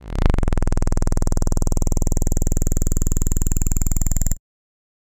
lead bass rise made with Blackbox from arcDev Noise Industries
commnication, fx, scoring, soundeffect, soundesign, synth-noise